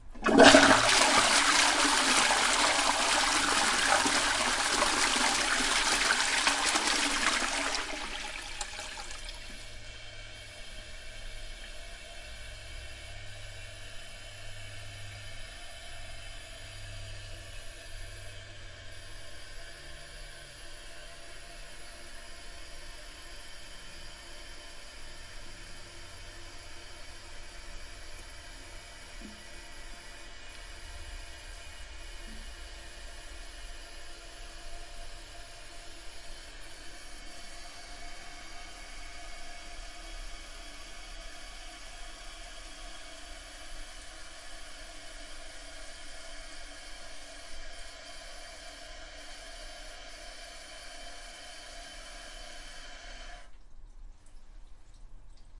Toilet Flush with Cistern Sounds
Recorded on my Roland R-26 in my bathroom
plumbing, flushing, cistern, flush, bathroom, water, toilet